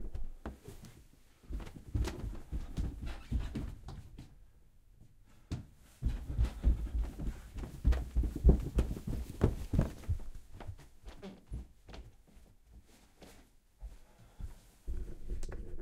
FX Footsteps Upstairs 01
walk, footstep, foley, step, steps, shoe, foot, footsteps